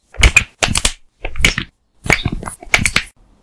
bones popping. actually broke my wrist for this sound effect!
Enjoy!